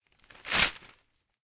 Raw audio of rapidly tearing a sheet of newspaper. The metro has its uses.
An example of how you might credit is by putting this in the description/credits:
The sound was recorded using a "H6 (XY) Zoom recorder" on 11th December 2017.